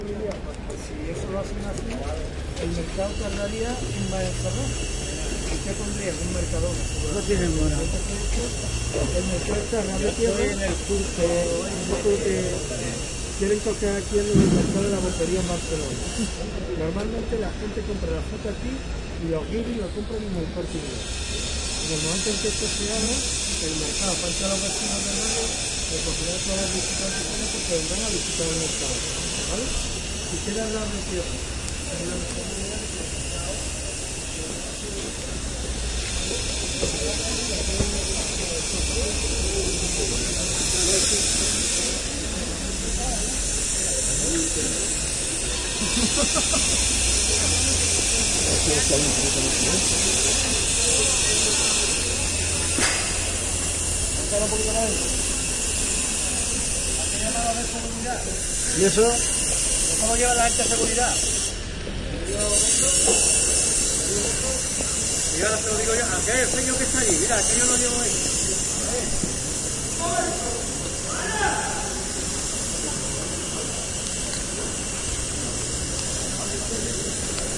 Construction noise at Plaza de la Encarnacion, Seville. In background a male voice explains the project of the new market being constructed. Recorded during the filming of the documentary 'El caracol y el laberinto' (The Snail and the labyrinth) by Minimal Films. Sennheiser MKH 60 + MKH 30 into Shure FP24, Olympus LS10 recorder. Decoded to Mid Side stereo with free Voxengo VST plugin.
ambiance, city, construction, field-recording, male, seville, spanish, voices